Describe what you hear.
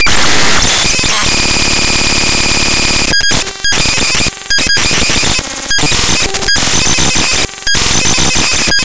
created by importing raw data into sony sound forge and then re-exporting as an audio file.
clicks, glitches, harsh